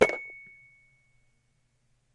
Stereo multisamples of a toy plastic piano recorded with a clip on condenser and an overhead B1 edited in wavosaur.
instrument, multisample, piano, toy